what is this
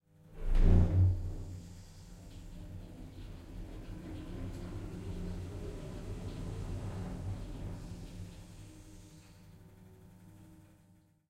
elevator travel 6a
The sound of travelling in a typical elevator. Recorded at the Queensland Conservatorium with the Zoom H6 XY module.
elevator, lift, mechanical, moving